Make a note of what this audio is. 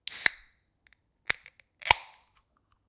Iron can opening effect.
Recorded by Zoom H5 recorder and Rode M5 stereo pair mic.
Mastering with Logic Pro X.
Perfect for movies, for soundtrack, theatre performance, presentations, advertising.